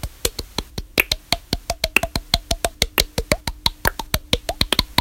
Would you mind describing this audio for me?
SEQUEIRA-Laura-2018-Clap-clac
For this sound I wanted to give a slam sound mixed to a sort of storm sound.
I used the "echo" effect = 4 secondes to give more intensity
blow, slam